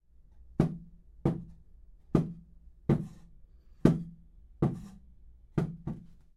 chair being taken in and out of balance
balance, Chair, noise